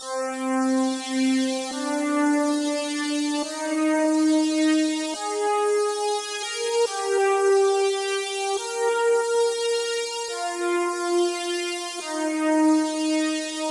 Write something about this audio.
Nephlim pad
Pad sequence with delay and flange.
140-bpm, bass, beat, distorted, distortion, flange, hard, melody, pad, phase, progression, sequence, strings, synth, techno, trance